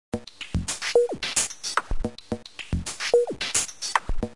electronica perc mix
Light electronic percussive loop.
110, beat, bmp, electronica, glitch, light, loop, loopable